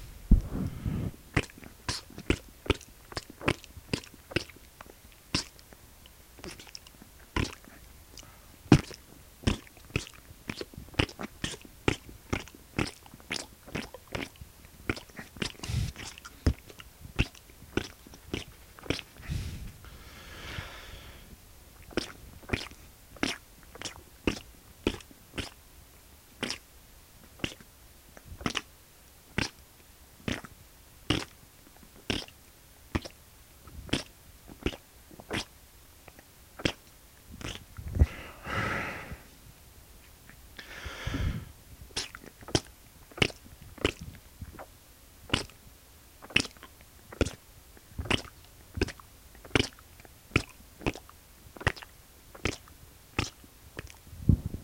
Me making a series of wet, ploppy squishy noises with my mouth. Punctuated by an occasional breath. Made to use as footsteps through squishy mud.
foley, mud, wet